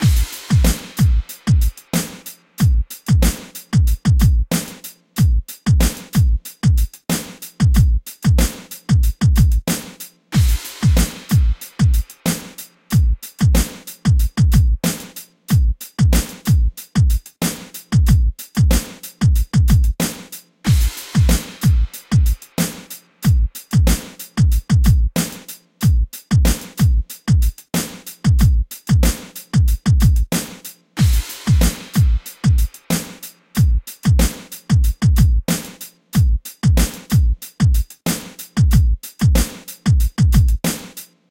HH loop 93 bpm
hip hop drum loop by Voodoom Prod created with Logic Pro
beat, hiphop, loop